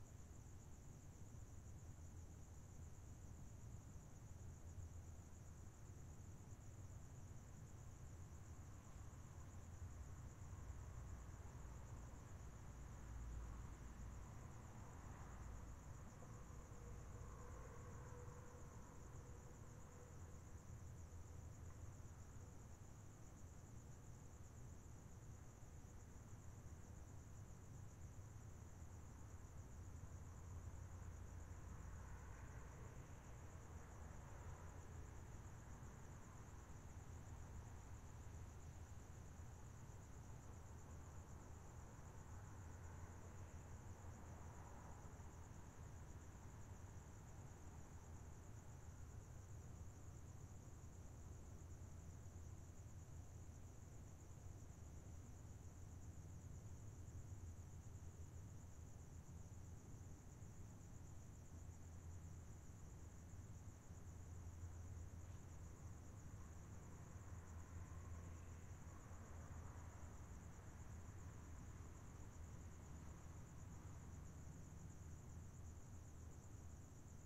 Outside in the late evening in North Park, has sounds of faraway traffic.